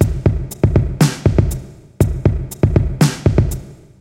Just a drum loop :) (created with flstudio mobile)
drums, synth, dubstep, loop, drum, beat